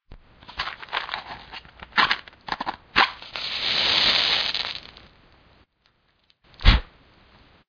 Match Strike 1
A match strike